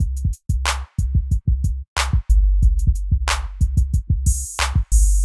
Basic Hip Hop loop 1
loop, hip, bass, school, hip-hip, rap, road, drum, rd